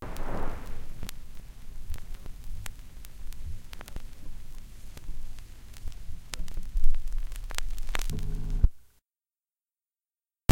BnISI side1
LP record surface noise.